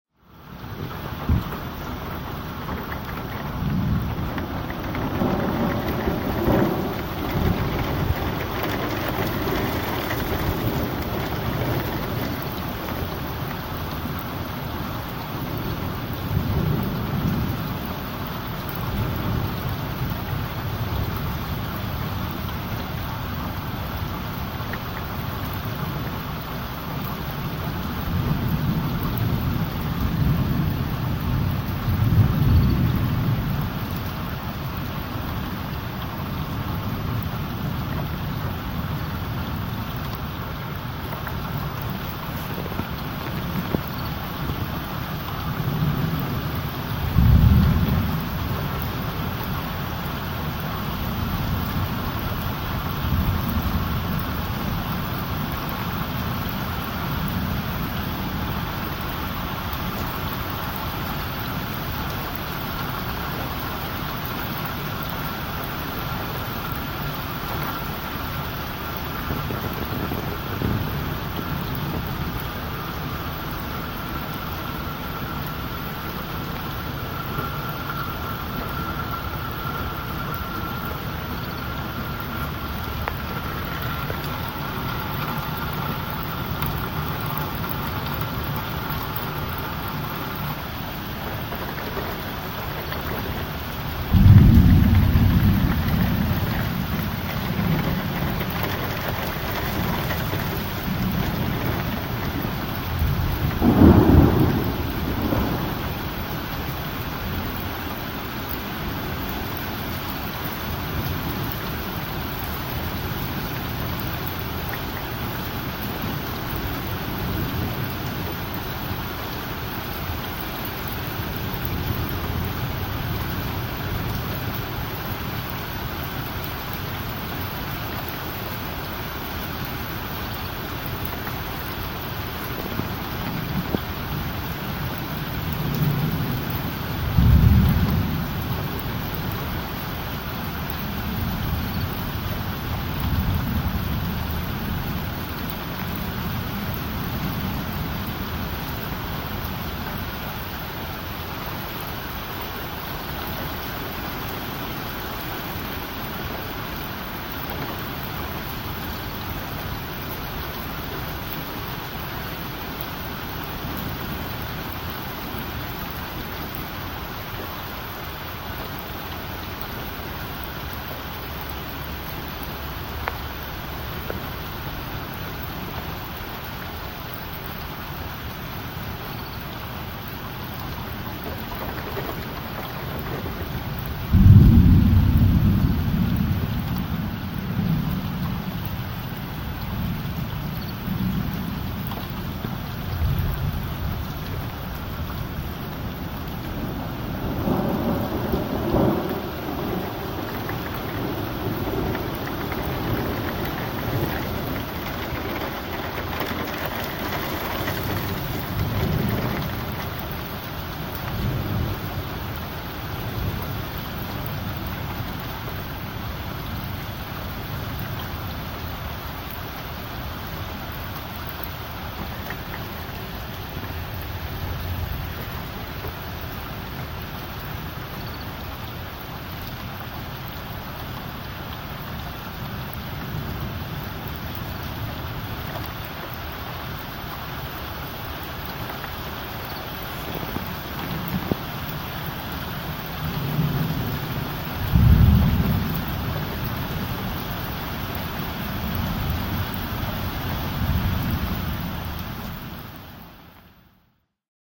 Thunderstorm Lincoln NE 9 8 15
A thunderstorm that rolled across Lincoln, Nebraska early this morning.
field-recording, lightning, nature, rain, rainstorm, storm, thunder, thunderstorm, weather